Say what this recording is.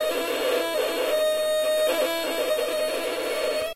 Effect was created with a standard wood violin. I used a tascam DR-05 to record. My sounds are completely free, use them for whatever you'd like.